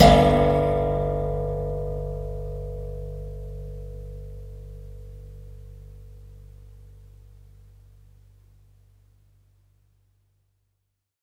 This sample pack contains eleven samples of the springs on an anglepoise desk lamp. I discovered quite by accident that the springs produced a most intriguing tone so off to the studio I went to see if they could be put to good use. The source was captured with two Josephson C42s, one aimed into the bell-shaped metal lampshade and the other one about 2cm from the spring, where I was plucking it with my fingernail. Preamp was NPNG directly into Pro Tools with final edits performed in Cool Edit Pro. There is some noise because of the extremely high gain required to accurately capture this source. What was even stranger was that I discovered my lamp is tuned almost perfectly to G! :-) Recorded at Pulsworks Audio Arts by Reid Andreae.